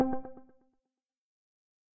SwarajiwaTH Perc1 C4
Bell-like percussion at C4 note
bell, percussion, synth1